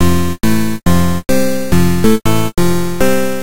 always ready
game, hero, strong